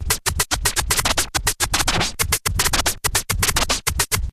Juggling a snare with a vinyl record.